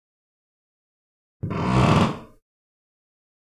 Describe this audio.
crackle of a wooden floor. recorded with zoom h4n
wood, foley, floor, crackle